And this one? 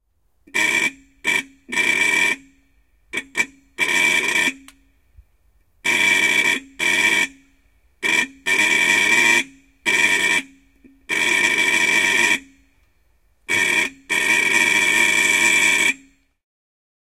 Henkilöauto, vanha, äänimerkki, auton torvi / An old car, hoarse horn honking, Mercedes Benz 170 SV, a 1954 model
Mercedes Benz 170 SV, vm 1954, mersu. Auton käheä äänimerkki muutaman kerran. (Mercedes Benz, 1770 cm3, 52 hv).
Paikka/Place: Suomi / Finland / Kitee, Kesälahti
Aika/Date: 16.08.2001
Yleisradio, Cars, Autoilu, Finland, Autot, Yle, Field-Recording, Suomi, Auto, Car-horn, Motoring, Soundfx, Tehosteet, Finnish-Broadcasting-Company